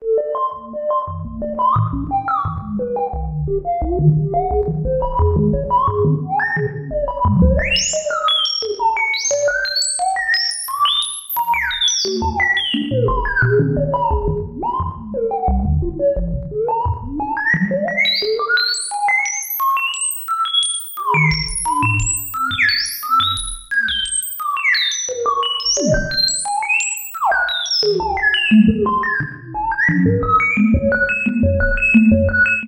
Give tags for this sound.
lab; movies; sound-design; mad-scientist; 50s; sic-fi

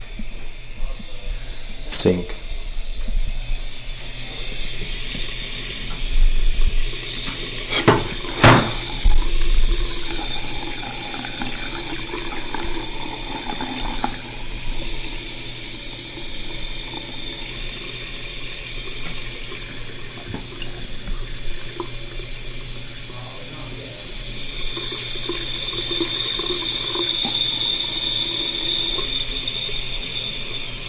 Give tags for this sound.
running; sink; water

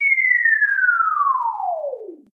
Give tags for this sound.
movie; film; game; falling

